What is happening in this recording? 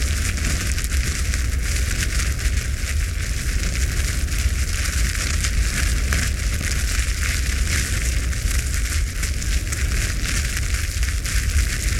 Fire loop made by crumpling wax paper
fire, loop